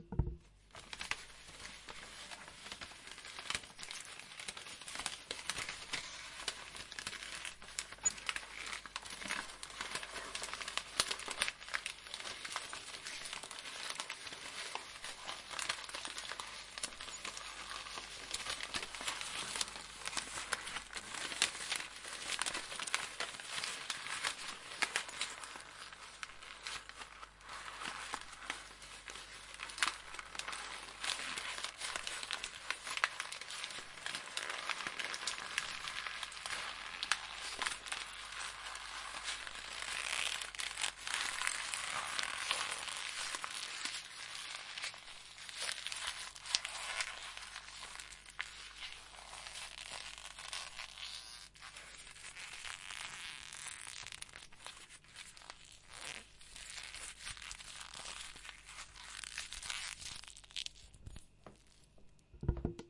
paper scrunch